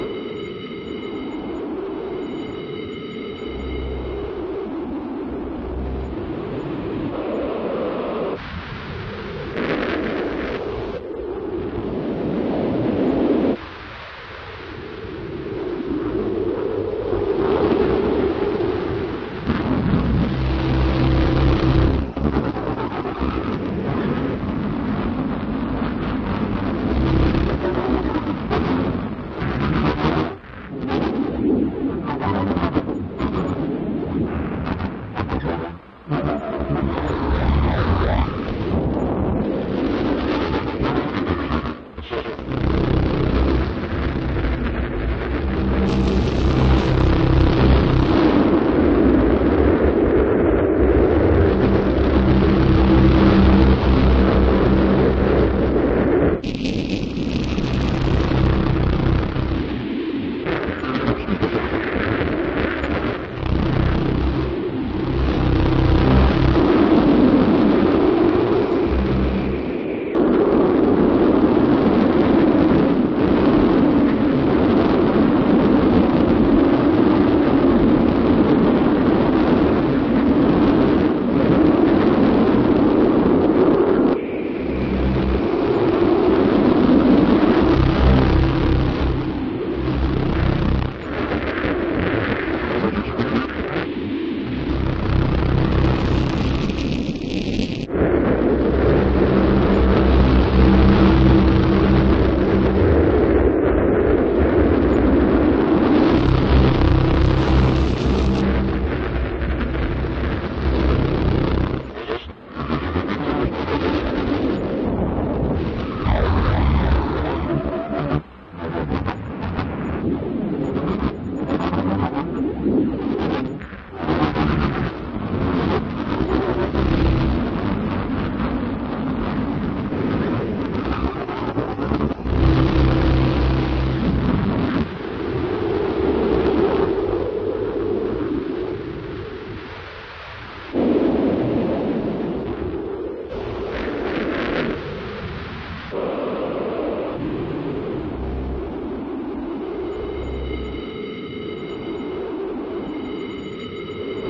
Radio-Interception-1A5
Intercepted Radio Transmission Number 1A5.
Windtryst Farm Bellefonte Pa.
Tesla Spirit Radio Experiments
Intercepted Transmissions
"I am a State Certified Inspection Mechanic" - B.Z. DaHocken
Ambient, Ambient-Radio, Anthropophobia, AudioChosis, Dreamscape, Experimental, June-5th-2017, Memory-Stain, Noise, Project, Steven-Allen, The, Windtryst-Farm